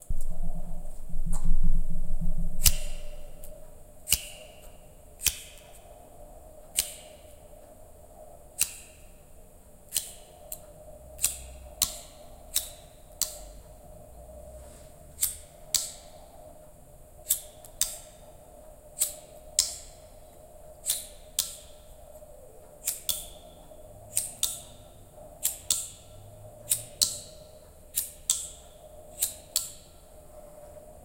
the sound of a lighter recorded a few times to get a bit of percussive tonal variation